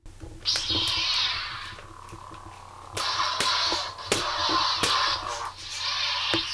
i made this with by swinging a toy lightsaber.